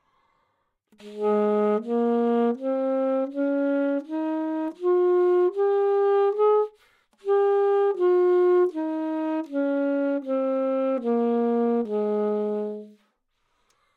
Sax Alto - G# Major
Part of the Good-sounds dataset of monophonic instrumental sounds.
instrument::sax_alto
note::G#
good-sounds-id::6814
mode::major